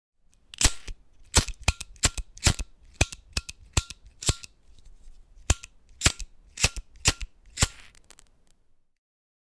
lighter multiple tries
Multiple tries of classic clipper lighter
cigarette, clipper, flame, lighter, spark